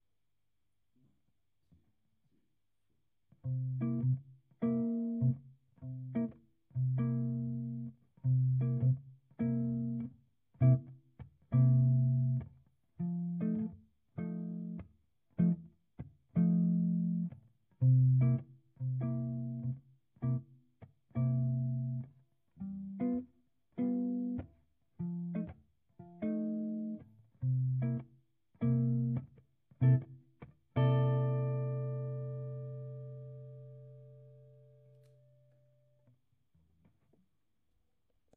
Riff Blues: Chords
Accompaniment for riff guitar melody for blues at 89bpm.
0, 12, 13, 1x12, 89bpm, bar, clean, electric, flat, gauge, Gibson, guitar, humbucker, Marshall, SG, strings, wound